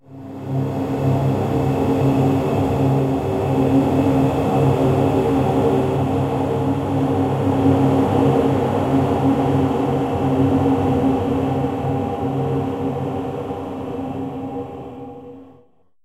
Ghost Transition
A group of hums, they pass by. Letting you to live... For now! Own voice recording, change speed and Granular Scatter Processor.
Recorded with a Zoom H2. Edited with Audacity.
Plaintext:
HTML:
creepy, feedback, rpg, role-playing-game, ghosts, video-game, scary, sci-fi, game-design, game-sound, adventure, action, dark, fantasy, horror, transition, voices